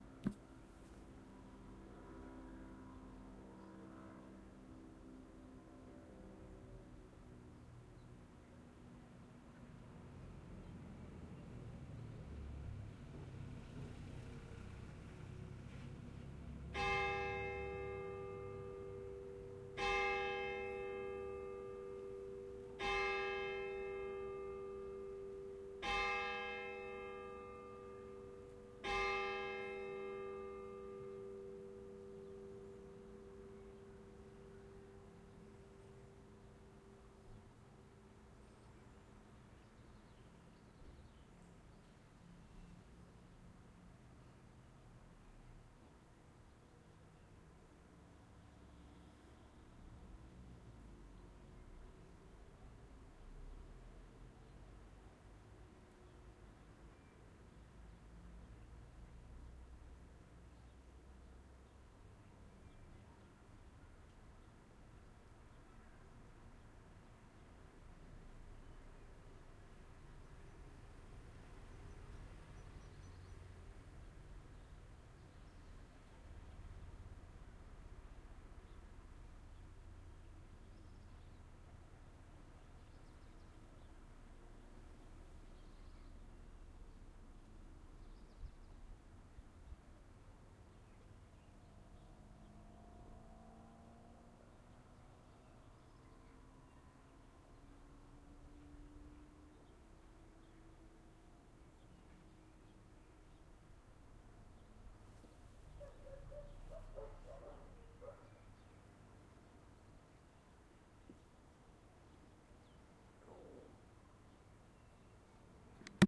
Churchbell Village
A small village in Switzerland. The church clock strikes 5 p.m. You can hear a distant sport plane and quietly a car. At the end a dog barks.